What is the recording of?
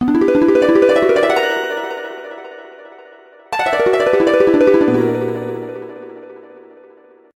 harp gliss 03
Dreamy harp transitions.
cinematic, dreamy, film, flashback, harp, melodic, music, musical, orchestral, plucked, romantic, sting, transition